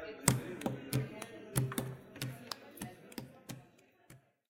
Hitting a wooden table with the palm of the hand.
Sound produced by the strenghtening of fingers against a wooden table.
Technical aspects:
- Zoom H4n Handy Recorder
- Built-in microphones.
Edition:
- Adobe Audition CC
Effects:
- (Slight) Noise Reduction (Process)
- Fade Out
- Multiband Compressor -> Classical Master
- Filter and EQ -> Graphic Equalizer (20 bands) -> Classic V